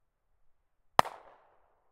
The sound of a glock21 being fired
field-test, Glock21, gunshot